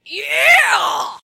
it's actually "yeah," but it turned toward an "ah" sound at the end